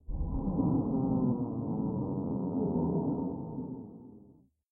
A groaning phantom is at your elbow!
demon, scary, haunted, horror, fear, evil, ghostly, ghost, fearful, moaning, groan, nightmare, devil, eerie, phantom, spooky, hell, undead, haunting, ghoul, wheeze, breath, paranormal, zombie, monster, groaning, creepy, possessed, moan